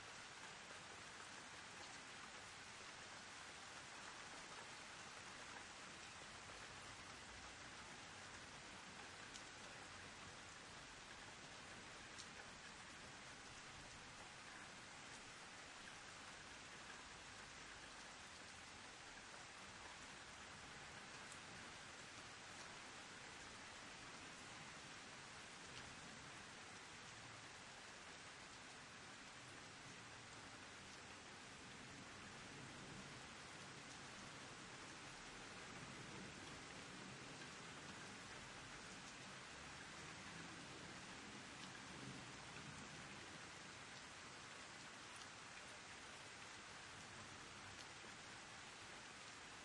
Rain loop
Stereo recording of reasonably heavy rainfall in a residential/urban area.
Processing in Cubase:
Gain correction (no change in dynamic range), HPF @ 100 Hz.
rain, rainfall